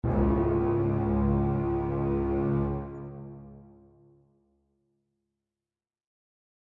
Angry Boat 1
The epic and ominous orchestral "BRRRRRRRRRM" sound often found in movie trailers, such as Inception, Shutter Island and Prometheus. I've nicknamed it the 'Angry Boat'.
Made with Mixcraft.